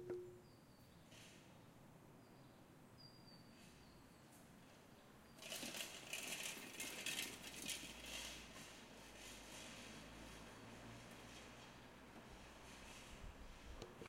Berlin bicycle passing - mostly sound of bike rack rattling, street ambience. Zoom H4n. Stereo.
field-recording, street